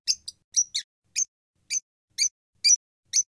Recorded my little parrots with an AKG D3700S direct into Terratec soundcard.
parrots, agapornis-rosseicollis, birds